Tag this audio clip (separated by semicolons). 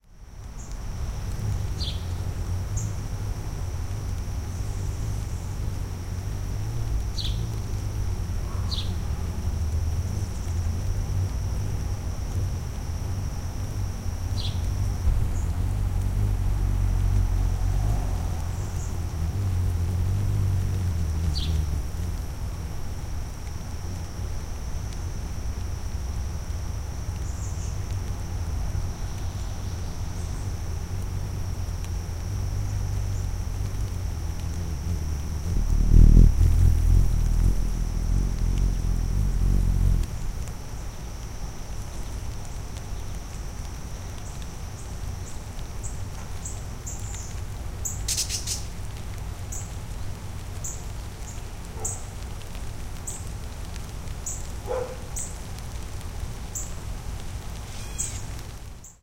hummingbird; neighborhood; backyard; ambience